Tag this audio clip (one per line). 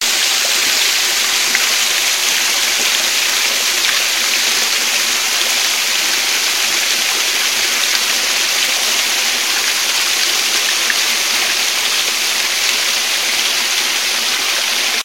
loop
Water